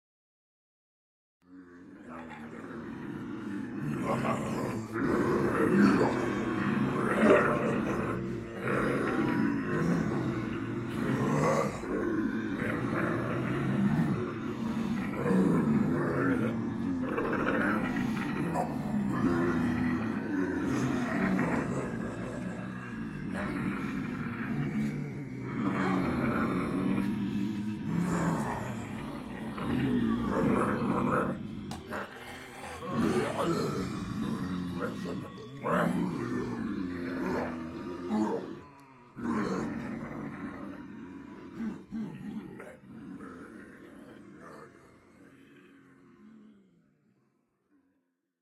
Zombie Group 7D
Multiple people pretending to be zombies, uneffected.
group, roar, undead